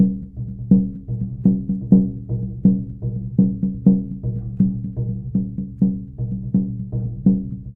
Stairs Drum Loop
ZOOM H4 recording of drumming on the spiral staircase in my home.
drumming, loop, metallic, spiral-staircase, stairs